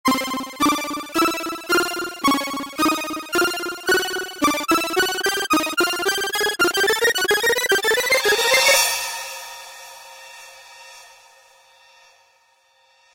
Opening Chest
A chest opening that was inspired by Legend of Zelda. Created with GarageBand on October 9th.
Thanks!
Chest, LegendOfZelda, Open, Opening